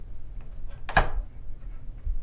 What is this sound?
Lightswitch ON
Switching ON of a ceiling light
click, turn, switch, light